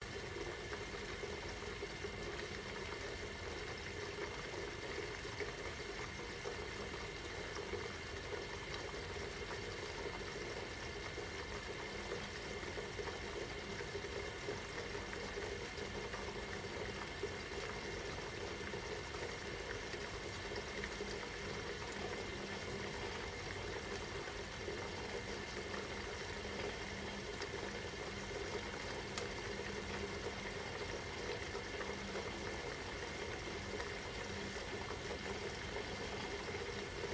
Water boiling.
Thank you!
boiling, bubbling, water, water-bubbling, water-boiling